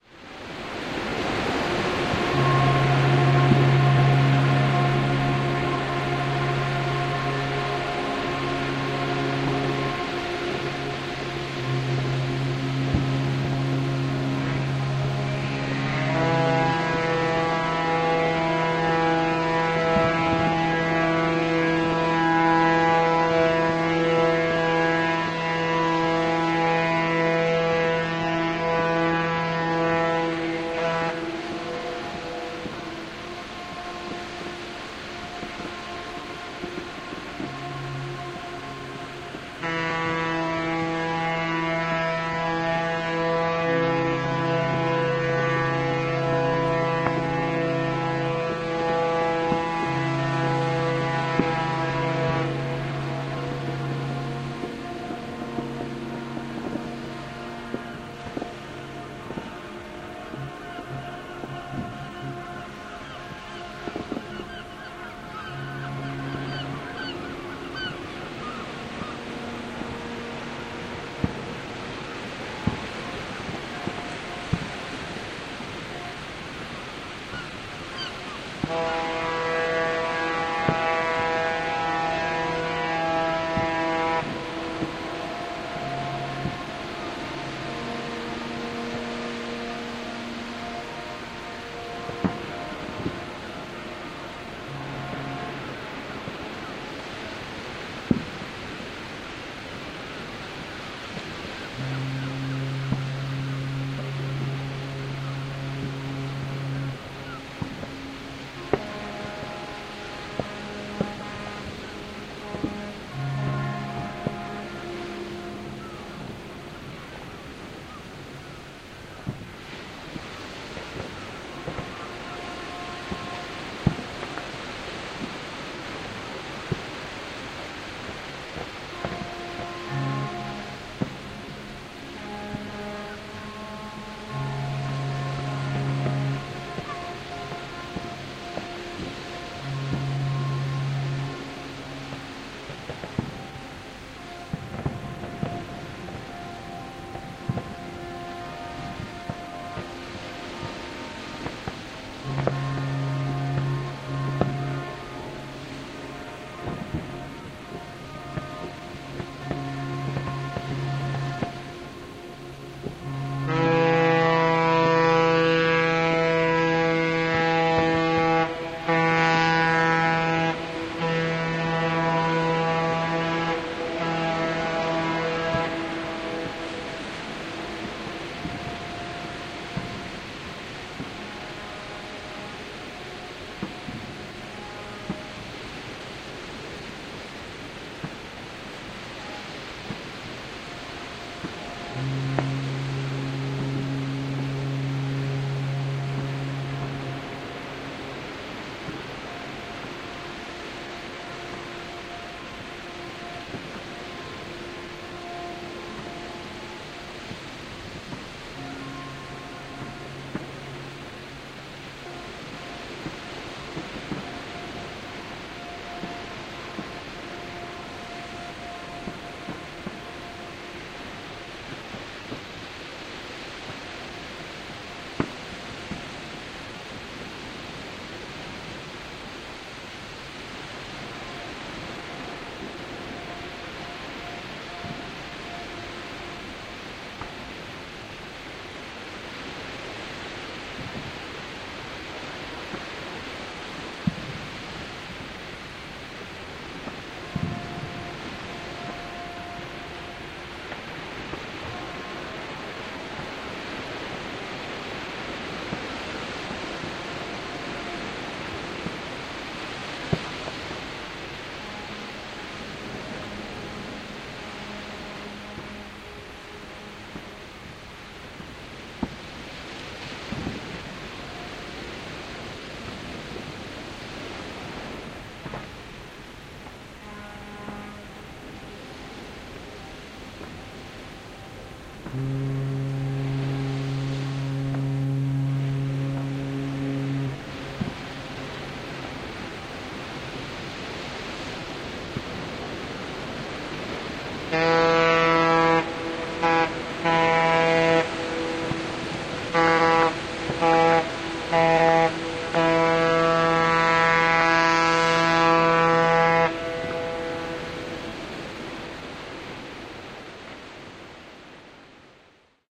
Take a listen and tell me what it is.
This is a recording of boats at midnight, New Years Eve on the North Sea near the village of Footdee. They are blowing their horns in celebration of the New Year and the Scottish tradition of Hogmanay. This recording was made by Bill Thompson
Thompson, Bill, FieldRecording, Phonography, Recording, Proflofi, Experimental